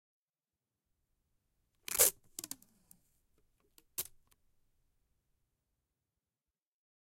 plastic tape
Peeling several centimetres of Sellotape branded sticky back plastic off a roll.
Olympus LS-5, built-in mic capsules, no filtering.
backed, noise, office, paper, plastic, roll, sellotape, sticky, tape